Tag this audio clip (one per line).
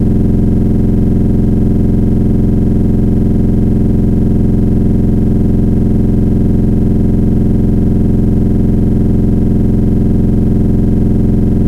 machine
motor